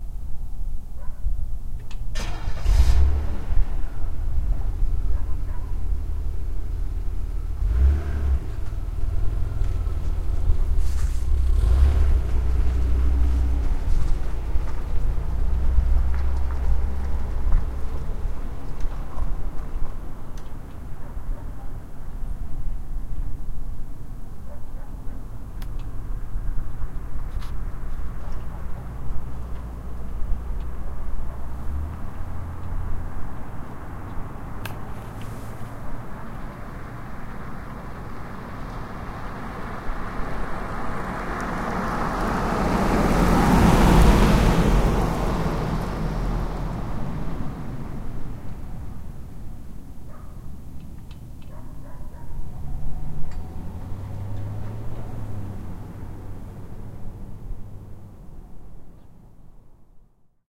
Downtown Sugar City
Recorded in Sugar City CO on Main Street. One pick-up starts and another one drives by.
Tascam-DR-05, pickups